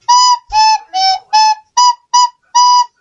Recorder playing music snip-it
Flute, Instrument